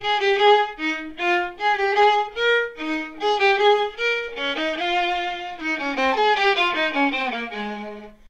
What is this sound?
DWK violin maggiore
This is a violin sound (the theme of J.S. Bach Fugue c-moll from Das Wohltemperierte Klavier) pitched from C4 (262Hz) to D5 (587Hz), processed by a set of my "hand-made" DSP algorithms in C++ (spectral analysis/resynthesis, phase vocoder).